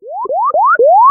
My third idea was a siren sound. To do it, I generated in Audacity a short whistle (with a sinusoïde wave) which I repeated 4 times (for the 4Th time, I slowed down the whistle). Then, I did a fade out and I changed the progressive variation of the heights.
alarm, siren, whistle